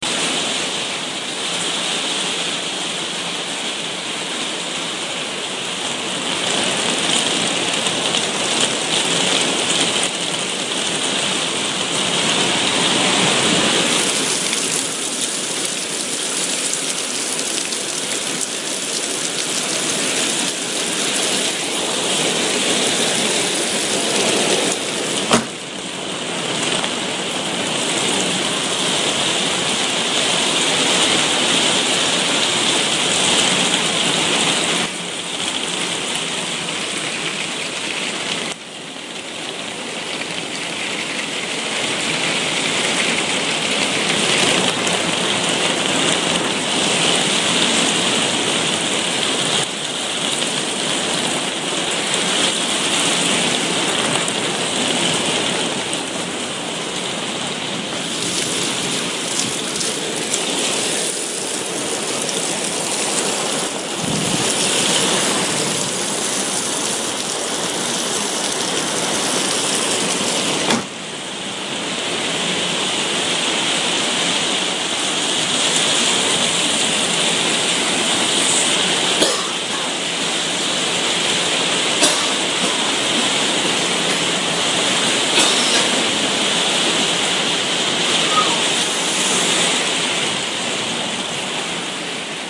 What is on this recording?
Hard Rain Whilst I Chill Out Back
i just recorded this on my phone whilst i was chilling in the conservatory atont the back of my house...the heavens literlly opened for a very heavy shower of...you will hear the rain on the roof and i move the phone closer and further away from the roof so to get a good soundrange..i also open the door and let the recording pick up the natural outside showering and rain gushing over the gutters hitting the patio and back step.. also i splutter a couple of times but its background .. oh and a whistle at the end...
enjoy the golf all /=]
Background, Bang, Cascade, Cough, Door, Gutter, Inside, Outside, Plastic, Rain, Real-life, Roof, Shut, Soundscape, Splash, Splatter, Water, Weather, Whistle, Wind